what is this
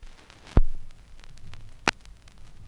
The sound of a stylus hitting the surface of a record, and then fitting into the groove.
analog, needle-drop, noise, record